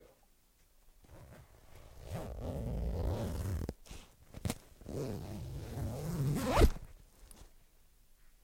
Zipping up my bookbag
backpack, bag, unzip, zip, zipper